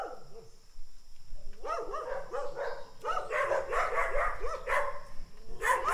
Grabación de ladridos de perros afuera de la capital Ecuador.
animales, aullidos, luz, perros, Tierra